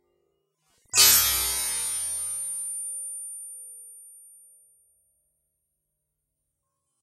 Sad Chime Effect
I accidentally made this in Audacity adjusting the tempo of a chime sound effect, but maybe it has uses elsewhere. Sounds to me like a game show "wrong answer" sound effect or a magic spell that fails to cast.